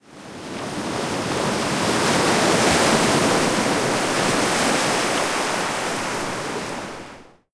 Ocean wave hitting the beach.